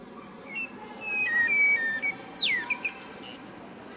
20060530.blackbird.trill
a single, weird trill from a male blackbird / un trino raro hecho por un macho de mirlo